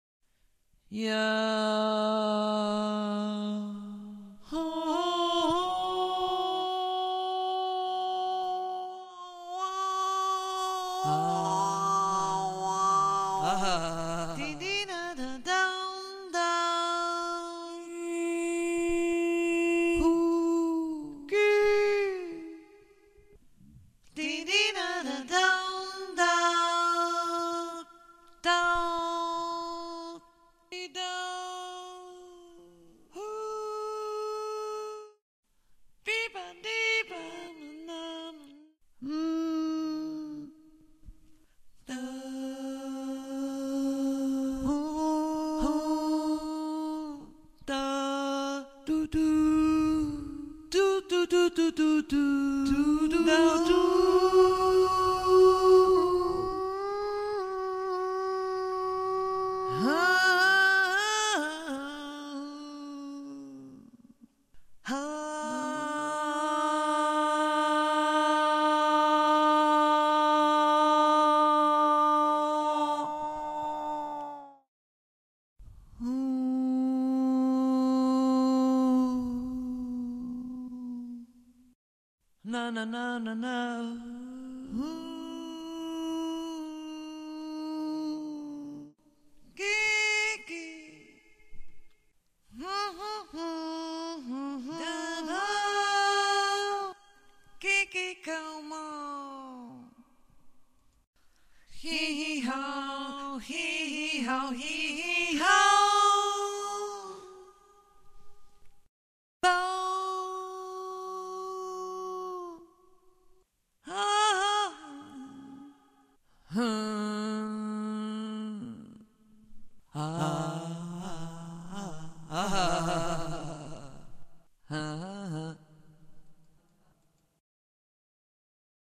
Imaginary sounds 2
sampling, sound-design, souds, stretch, sound, free, personal, roses, imaginary